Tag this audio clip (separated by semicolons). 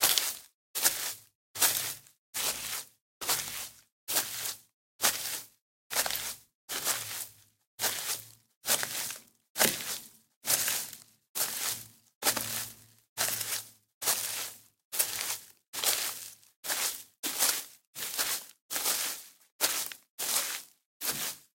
Boots; Dirt; Foley; Footstep; Footsteps; Grass; Ground; Leather; Microphone; NTG4; Paper; Path; Pathway; Rode; Rubber; Run; Running; Shoes; Stroll; Strolling; Studio; Styrofoam; Tape; Walk; Walking; effect; sound